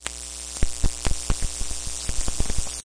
video game sounds games